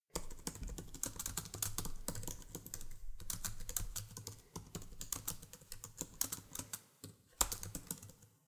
Typing on a MacBook Pro's keyboard. Recorded with Zoom's H6 stereo mics in a room. I only amplified the sound.
macbook, field-recording, keyboard, foley, computer, keys, typing